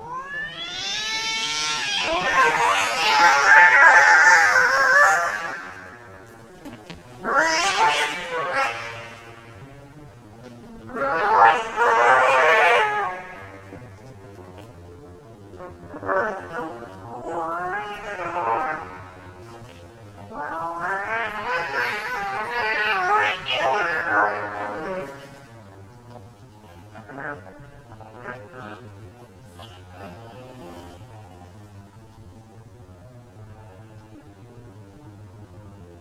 Cat fight slomo
I loved the first cat fight scene so much that I felt I wanted to slow it down so one can hear what happens. This is time stretched with FL Studio 7.